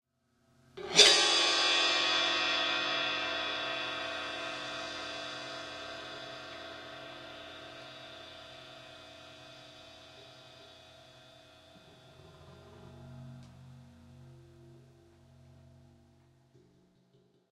cYmbal Swells Royer-035
i was demoing the new Digital Performer 10.1 with a Royer R-10 pair in Blumlein array but wanted to check out one of the ribbons because it possibly sounded blown so i went ahead not bothering to create a new mono file. the mic was tracked through a Yamaha mixer into Digital Performer via a MOTU 624. i have various cymbals including a Paiste hi-hat and a Zildjian ride which i bowed or scraped. there is an occasional tiny bit of noise from the hard drive, sorry. it has the hiccups.
some of these have an effect or two like a flange on one or more and a bit of delay but mostly you just hear the marvelous and VERY INTERESTING cymbals!
all in my apartment in NYC.
ribbon-mic, cymbal-swell, Royer